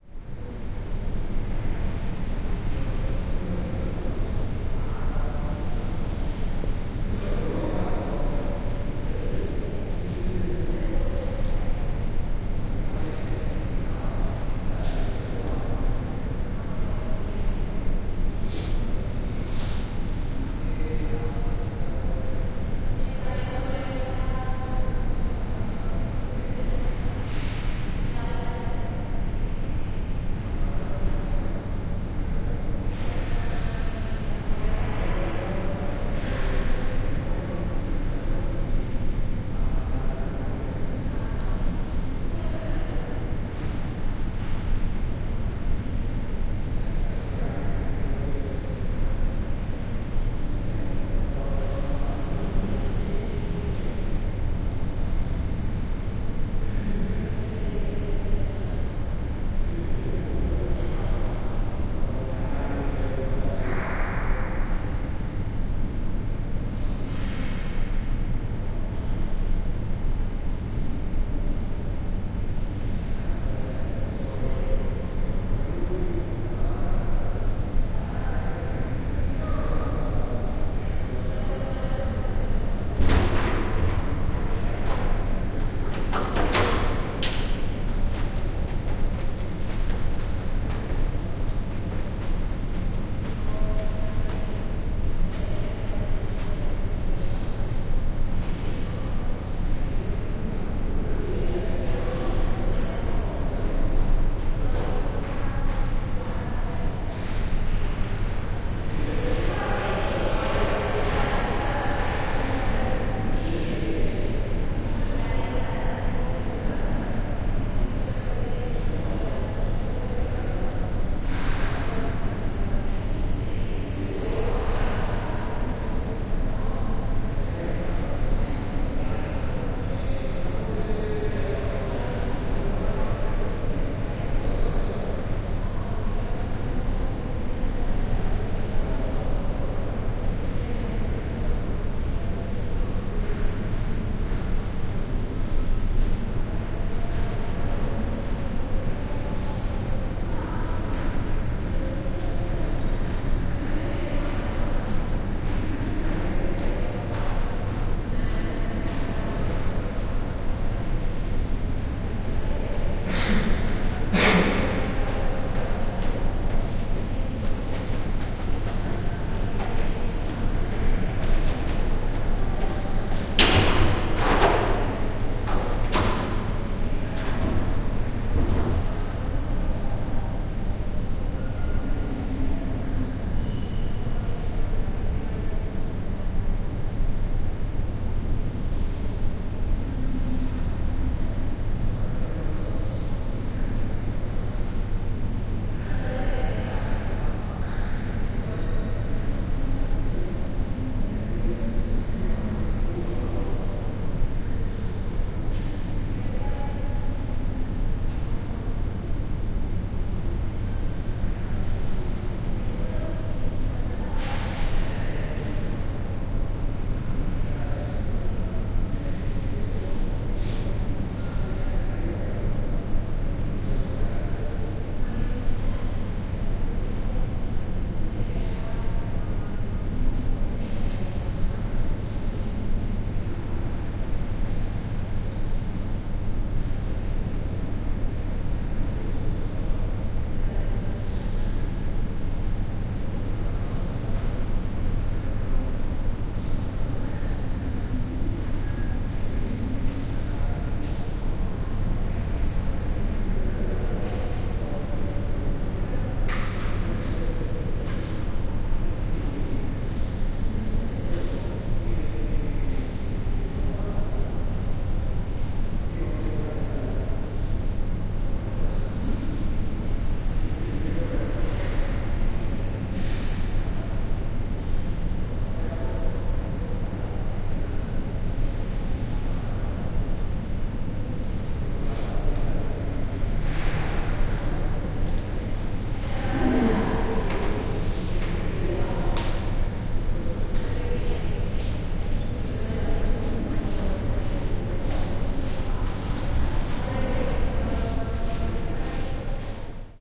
Hallway of University in silence
Hallway of the university silently, only listens the sound of a door that is opened accompanied of a few steps. There are no dialogs.
Interior of Gandía's Universidad Politécnica.
Recorded with headword binaural microphones Soundman OKM
university, noise, field-recording, atmosphere, hallway, background, stereo, ambience, silence, ambient, door, binaural